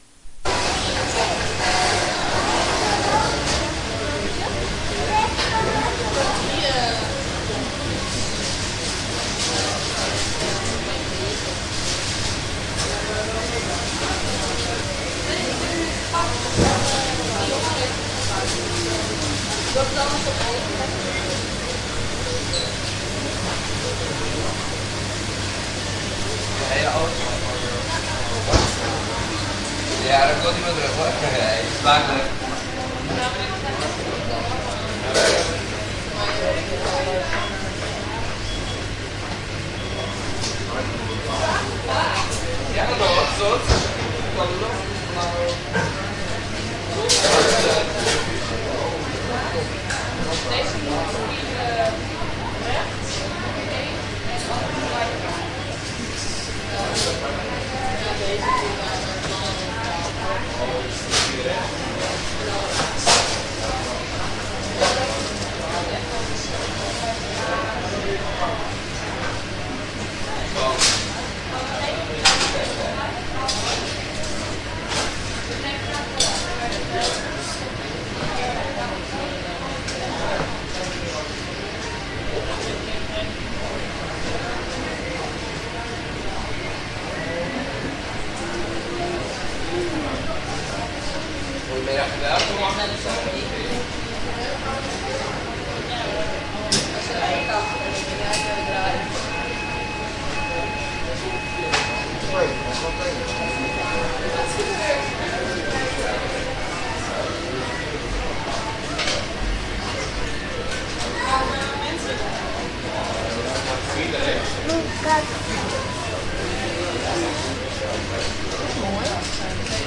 Recording at quiet dutch fastfood restaurant.
Almost no guests present.